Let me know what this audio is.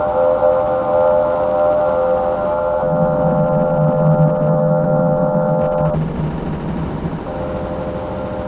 2-bar ambient loop; has a foghorn-like character to it; bit of a ground
hum feel in the background; created with Native Instruments Reaktor and Adobe Audition
loop, 2-bars, hum, noise, sound-design, ambient